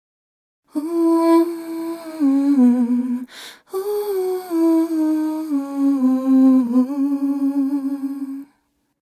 EndHum KT
'Katy T', female vocalist humming.